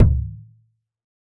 TomMed PlasticSaladBowlPlusAboxDrum
This was for a dare, not expected to be useful (see Dare-48 in the forums). The recorded sound here was a big, thin, plastic salad bowl (the disposable kind you get from catered take-out) being hit by something. The mixed sound was a complex drum-like percussion sound sound I created in Analog Box 2, along with the impulse (resampled to 4x higher pitch) used in the kick drum sound also from Analog Box 2. This one is supposed to fill the role of a lower-pitched racked tom on the kit. A lot of editing was done in Cool Edit Pro. Recording was done with Zoom H4n.
Dare-48, drum, drumkit, ElAcHo, fake, percussion, tom, tom-tom, trash-can